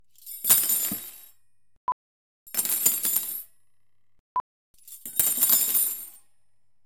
Cultery Drop
sink, water, stereo, empty